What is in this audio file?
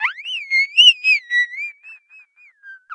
sonokids-omni 24
funny, sound-effect, electro, weird, beep, analog, electronic, filter, analogue, sonokids-omni, comedy, moog, fx, speech, ridicule, synthesizer, cartoon, toy, game, lol, happy-new-ears, synth, strange, bleep, fun, abstract, soundesign